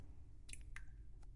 gum in toilet
gum falling into the water.